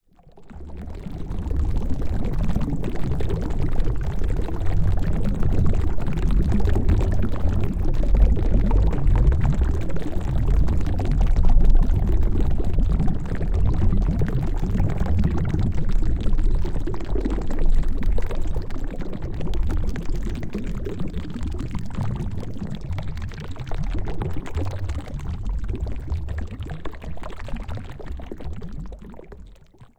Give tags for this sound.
water
ambience
ocean
sea
pool
deep
scuba
diver
underwater

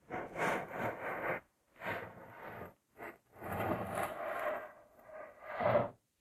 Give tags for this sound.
counter
cup
mug

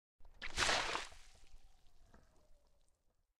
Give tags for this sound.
Mud
bucket
dirt

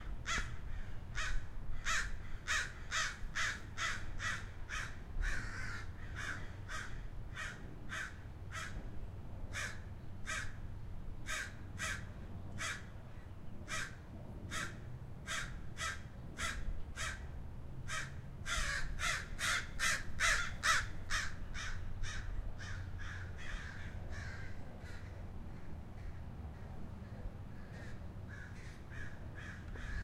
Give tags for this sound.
birds
crows
field-recording

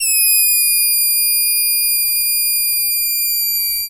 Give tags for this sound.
squeeky
sustain-sound
balloon

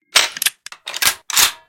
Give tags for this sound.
effect
gun
sfx
sound-design
sounddesign
soundeffect

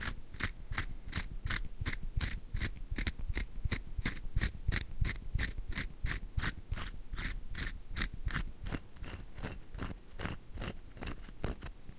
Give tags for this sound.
bassoon
bassoon-reed
cane
file
filing
ream
reamer
reed
rub
scrape
scratch
wood

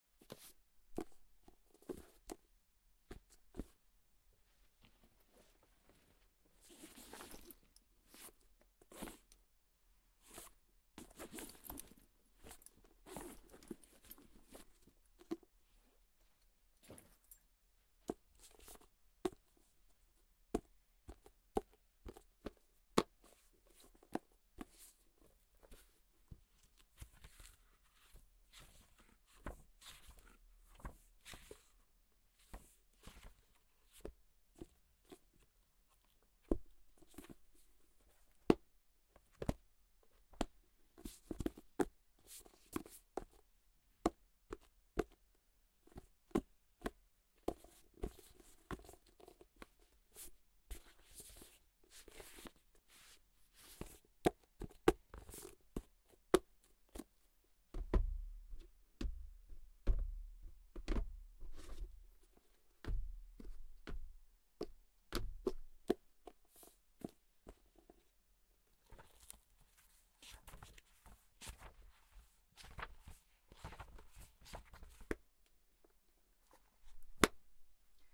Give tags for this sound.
pages
hardcover

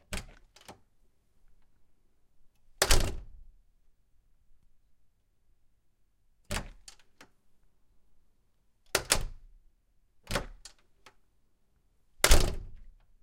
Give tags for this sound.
door
slam
wood

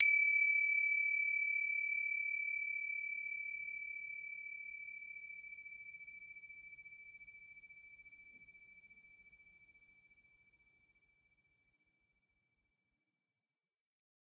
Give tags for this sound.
bell
bells
chime
meditation

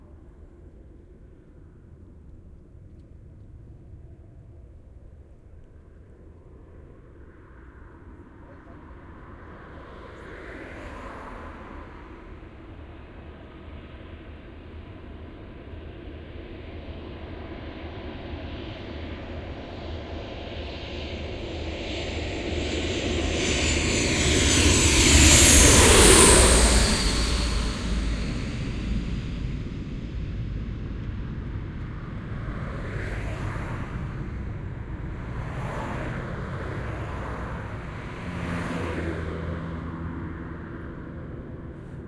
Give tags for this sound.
airplane
bright
car
close
overhead
pass

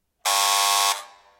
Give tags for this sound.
bell
Door
old
ring
unpleasant

